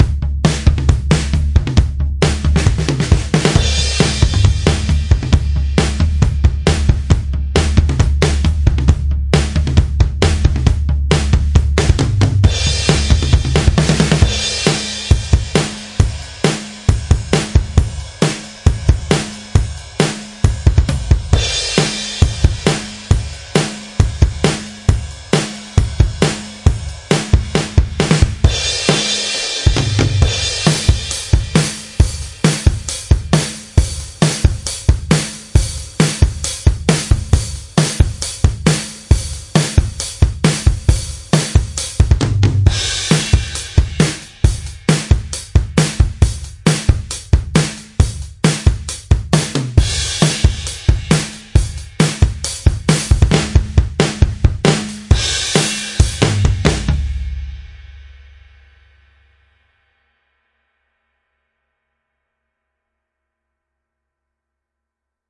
Fuzz 'n' Bleach DRUM 135 bpm
Fuzz 'n' Bleach DRUM 135. Grunge-que/ Hard Rock beat
Drum, bpm, Grunge, 135, Rock, Hard